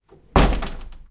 DOOR CLOSING - 2
Son de fermeture de porte. Son enregistré avec un ZOOM H4N Pro.
Sound of door closing. Sound recorded with a ZOOM H4N Pro.